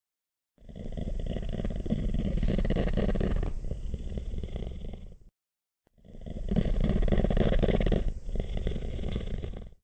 I recorded my cat's purr, and transformed it into the breath of a monster or fantastic creature.. I followed these steps :
1. Importing my cat's purrs
2. Change speed: speed multiplier: 0.610, change percentage: -39,000
3. Change the height: from 43.109 to 30.176
4. Delete parts of the audio to avoid the "hum" effect
5. Generate a silence: 1sc
6. Fade in and out certain parts of the audio
7. Copy and paste parts of the audio in order to have a more efficient opening fade in the 2nd part of the audio